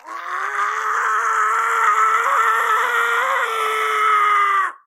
Painful scream
pain, Painful